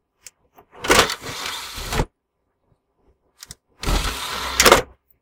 CD Holder Open and Close
Opening and closing my PC cd holder.
tray, open, close, cd, computer, holder, high-quality, pc